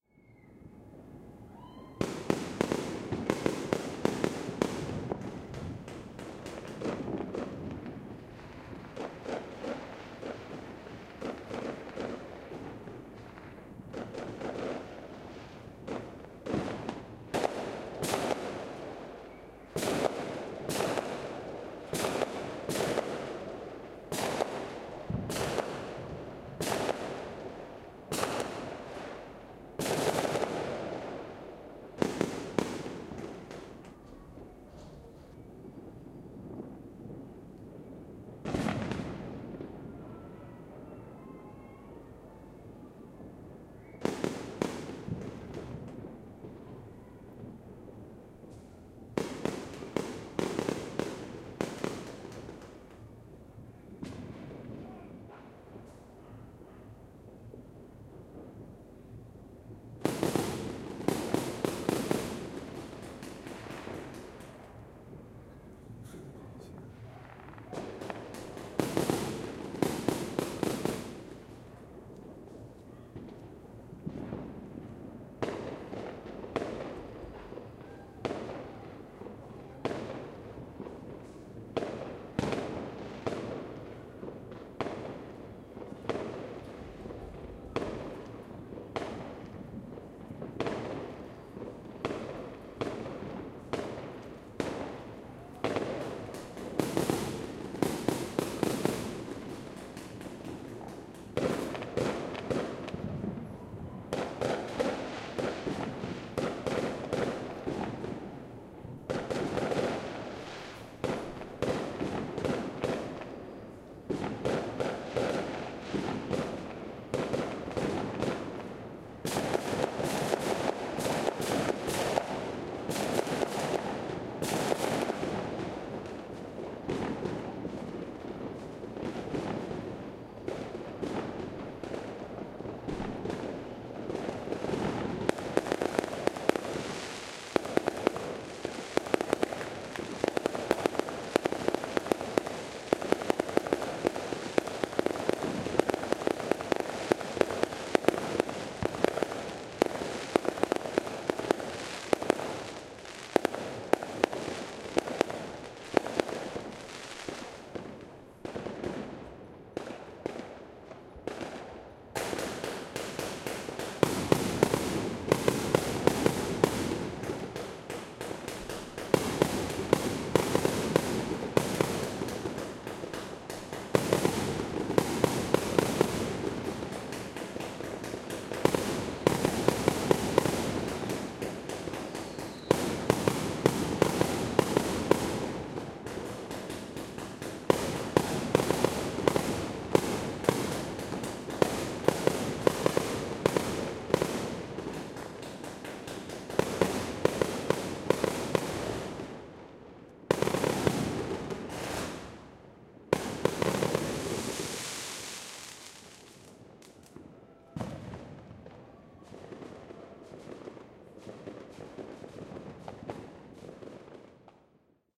New year eve firework recording 4 channels Zoom H4N Behringer C-2 Microphones edited and post processed in Ardour
explosion, fieldrecording, fireworks, new-year